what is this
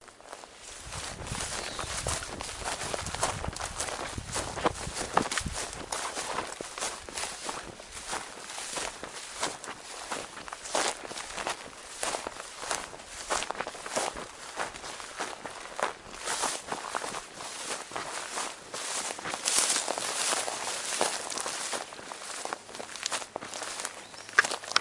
Walking in a forest quickly
Walking briskly and purposefully in a grassy forest. Dead grass being crunched underfoot, and living green grass brushing against clothes. A few birds in the background, and wind gently blowing through the grass. A great piece of audio to add to a movie or a video.
footstep, foot, step, walking, footsteps, forest, run, feet, shoes, dead-grass, running, stepping, grass, steps